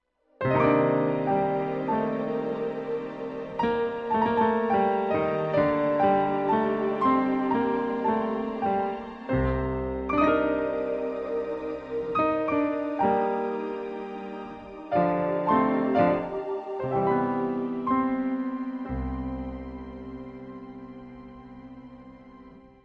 Jazzy Vibes #89 - Melancholic Jazz Piano
Atmospheric, Background, Cinematic, Dark, Dramatic, Film, Grand-Piano, Instrumental, Intro, Jazz, Jazz-Piano, Jazzy, Keys, Lounge, Melancholic, Mellow, Melody, Minimal, Minor, Mood, Movie, Music, Noir, Piano, Relaxing, Slow, Smooth, Solo-Piano, Soundtrack